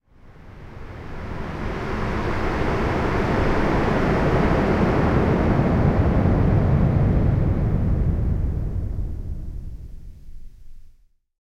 Coastal Freight
Pad sound, reminiscent of the surf hitting a ship, as heard from the inside of the ship.